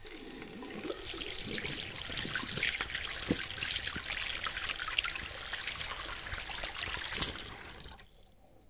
Tvätta händerna
Sound of me washing my hands.